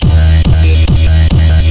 Heavy glitch loop...